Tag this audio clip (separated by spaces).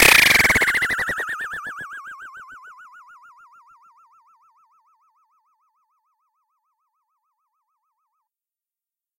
frequency-modulation oscillator digital Reason LFO FX